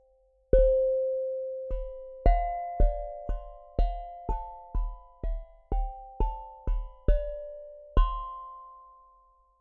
2023-01-07-childrens-toy-2x-contact-006
toy instrument recorded with contact microphones
toy, percussion, asmr, steel, music, hit